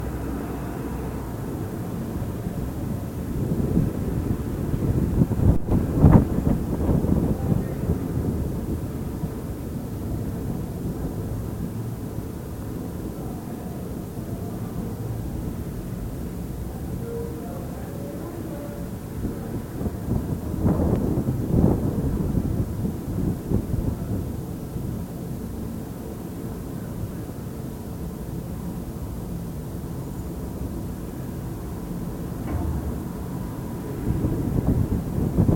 Denver Sculpture Scottish Cow T02
Contact mic recording of bronze sculpture “Scottish Cow” by Dan Ostermiller, 2006. This sits just to the east of the Denver Art Museum. Recorded February 20, 2011 using a Sony PCM-D50 recorder with Schertler DYN-E-SET wired mic; mic on the body near the left shoulder. Plenty of wind noise.
contact-microphone, normalized, wikiGong, Schertler, contact, Ostermiller, sculpture, contact-mic, mic, Sony, DYN-E-SET, bronze, Denver, field-recording